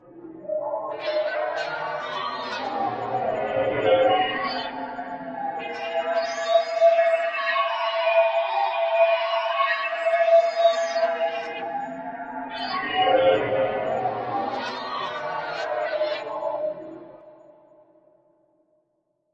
An atonal, electronic fragment created in Reaktor. One channel is the retrograde of the other.